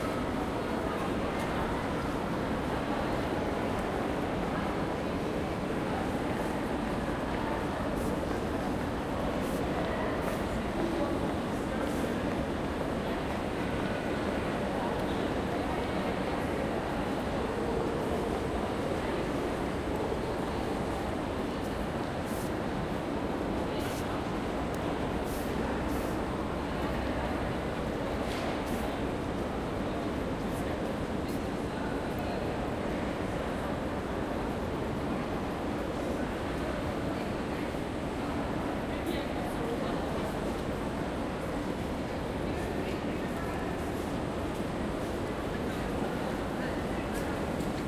Reception hall under the glass piramid of the Louvre.